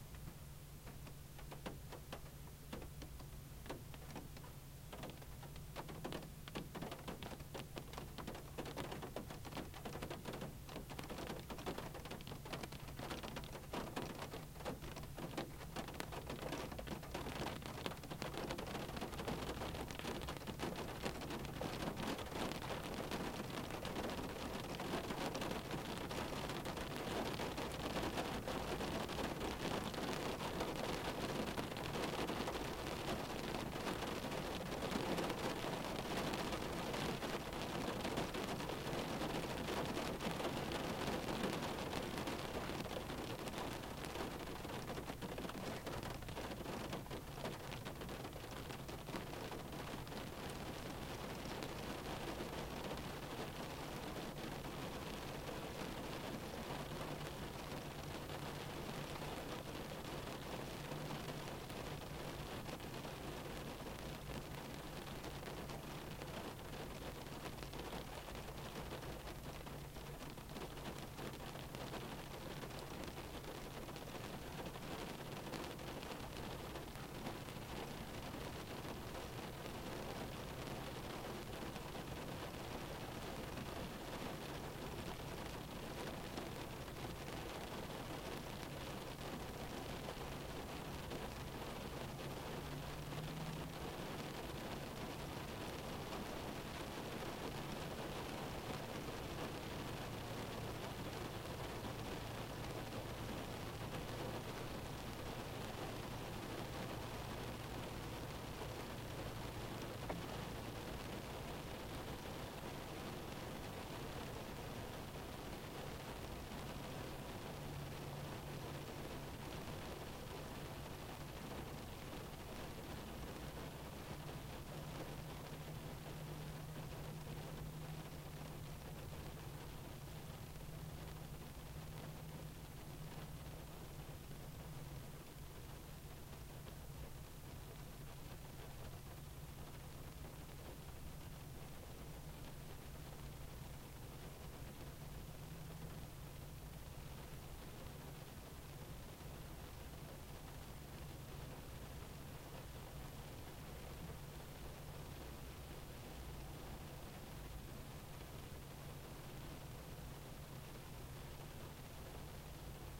Dashboard perspective of rain drops on my car during a sunshower recorded with HP laptop and Samson USB mic.
car, field-recording, automotive